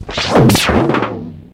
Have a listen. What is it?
Pitch-warped delay with brief squelchy slide.Taken from a live processing of a drum solo using the Boss DM-300 analog Delay Machine.